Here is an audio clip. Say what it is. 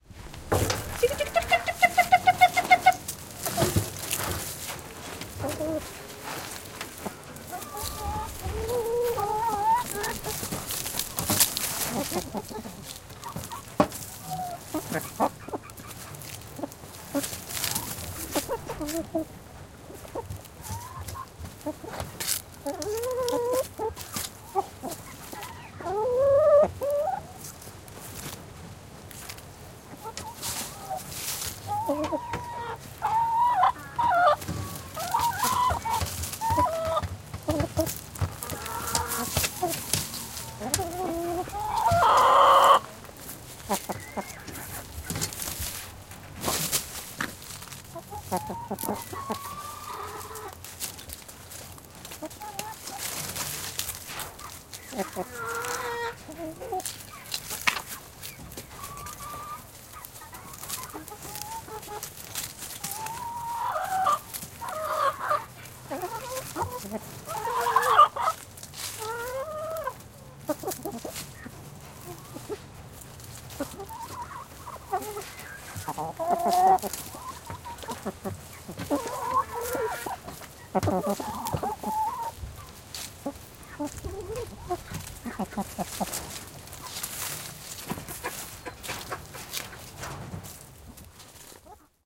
Chicken house2
Sounds of some happy chickens scratching around and eating.